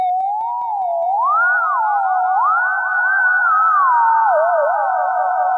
theremin4pingpong
Ping pong delay.
free, mousing, sample, sound, theremin